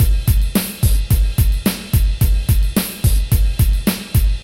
4 Beat drum loop